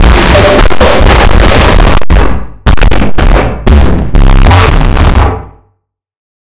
Unknown and very loud sound...
Made with one modular SunVox project
boom, booming, distortion, explosion, loud